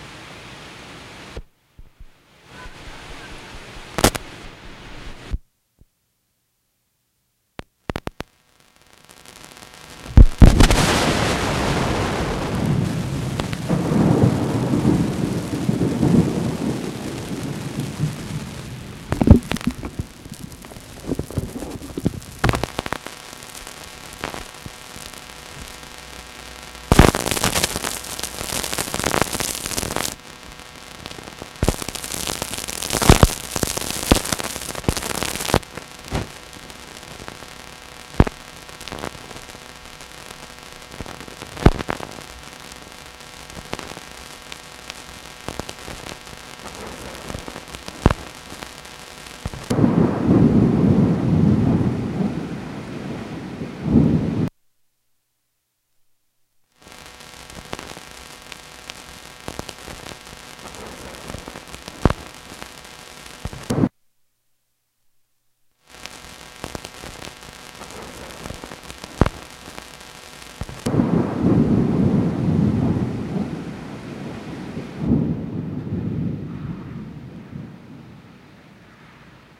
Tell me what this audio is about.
Thunder - bad microphone
Bad microphone connection - pops - buzzes and generally loud noise. This is more a bad microphone connection than a recording of thunder. Its what happens when you are in the right place at the right time, but your equipment refuses to cooperate.
1:19 - Recorded Spring of 1989 - Danbury CT - EV635 to Tascam Portastudio.
buzz, effect, field-recording, noise, soundeffect, thunder, thunderstorm